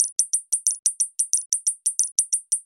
hi hat loop
hat, hi, loop